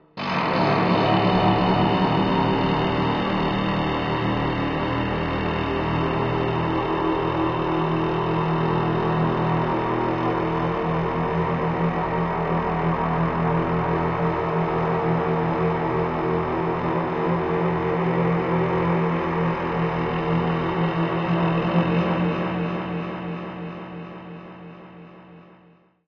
Resonating horn
For creating this sound I used:
Handmade didgeridoo of pvc tubes
Sound picked by microphone AKJ-XMK03
Effects used in post:
In Guitar Rig 4:
1. Tube compressor
2. AC Box amp
ambient, creepy, dark, deep, didgeridoo, drama, dramatic, drone, fade, haunted, horn, horror, phantom, resonance, scary, suspense, terrifying, terror, thrill, transition, wave, weird